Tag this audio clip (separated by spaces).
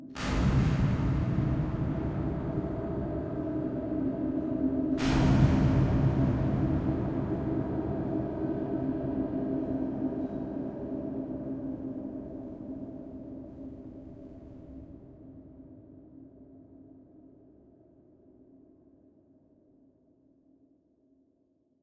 artificial soundscape drone pad multisample space